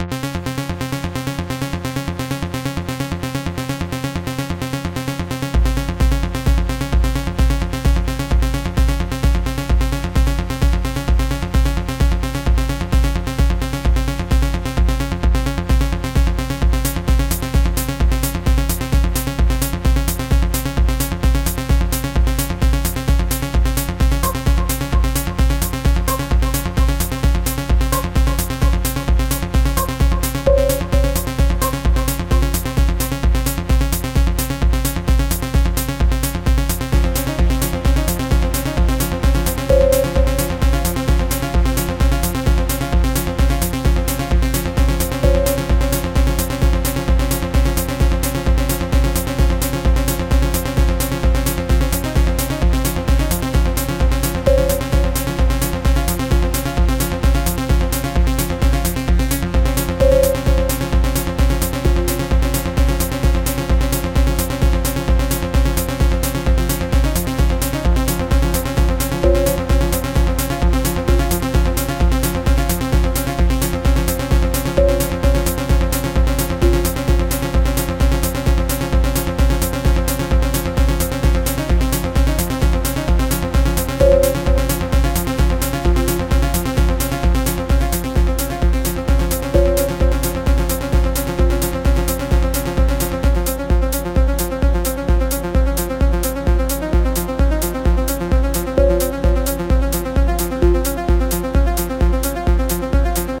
Axon 01 - Techno track loop
Synths : Ableton live,Silenth1,BIGROOMKIXSYNTH V1-1
club, music, electronic, track, techno